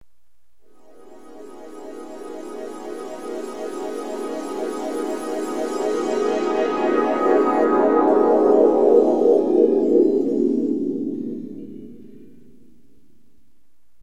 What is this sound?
synth loop with lo pass filter and delay